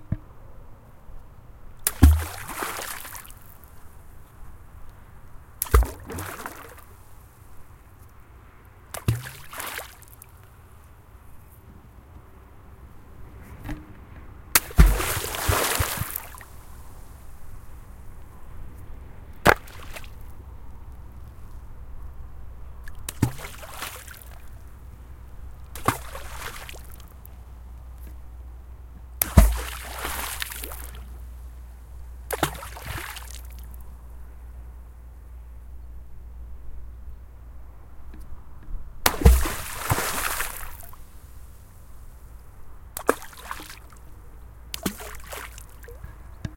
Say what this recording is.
stone in the water 080117-002 drop
stone in the water recorded in zoom H4n
water, field-recording, nature, sea, zoom, waves, ambient, stone, drop